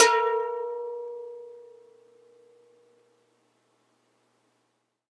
Red Bull Summer
Listen to the sound of these gorgeous cans of energy drinks. every can that is recorded in this samplepack has still not been opened.
pure, drink, energy, dong, dose, clang, metallic, can, gorgeous, ting, ding